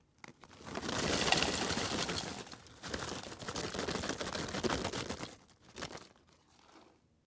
Popcorn Pour
Pouring microwave popcorn from a paper bag into a plastic bowl
food, pour, bowl